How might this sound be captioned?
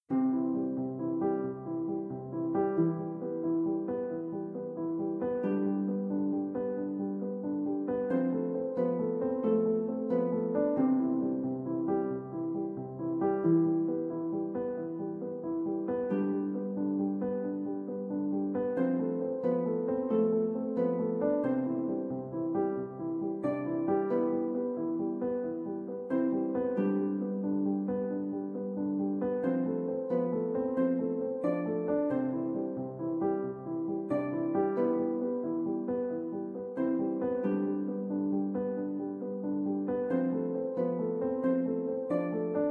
Soft Piano Loop #1
A soft and calm piano loop featuring a melodic harp, suitable for calm moments.
harp, melodic, soft, piano, inspiring, calm, loop, warm, bright, chillout